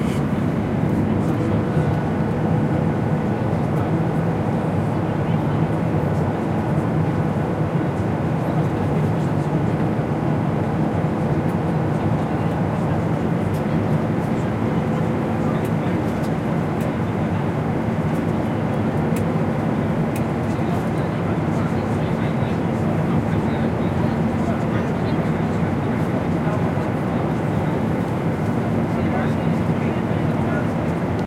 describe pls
130215 - AMB INT - Boeing 737
Recording made on 15th feb 2013, with Zoom H4n X/y 120º integrated mics.
Hi-pass filtered @ 80Hz. No more processing
Interior of the cockpit of a 737 plane